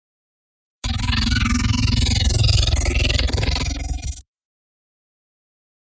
hungry dragon
resampled sound of scratching on a box
Rec. Tool: TASCAM DR-07MK2
Processed In: Ableton 9
glitch, music, fx, dragon, box, weird, wobble, samples, ableton, vibrato, live, growl, sora, recording, abstract, hungry, resampling